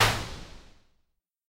Nord Drum SD 2
Nord Drum mono 16 bits SD_2
Drum, SD2, Nord